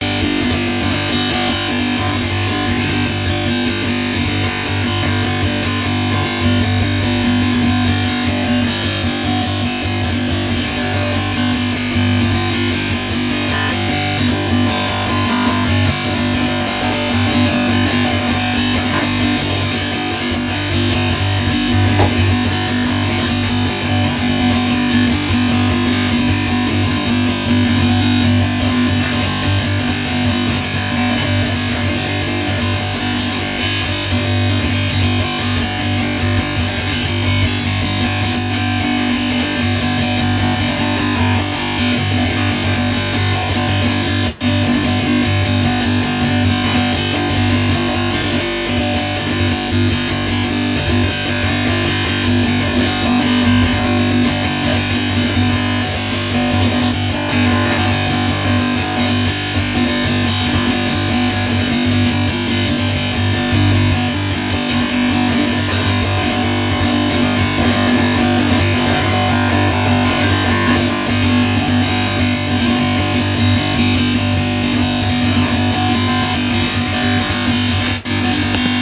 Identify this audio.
Windows 7 Crash
This is a recording of the scariest/weirdest computer crash I ever experienced. I was working in After Affects when the screen froze and this sound started blaring through my headphones. I unplugged myself and let it play through the speakers then decided it was really neat sounding, so I took a mic and recorded it. This was a couple years ago but I never uploaded it because the recording didn't do it justice. I opened the file recently and thought it sounded kind of neat anyway, so here you go, internet. The sound of Windows 7 rebelling and trying to come to life.